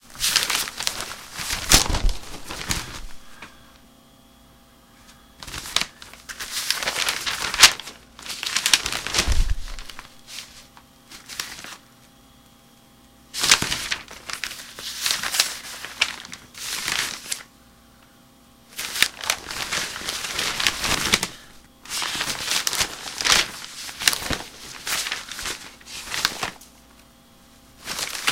Newspaper rustle
Reading and shifting newspaper pages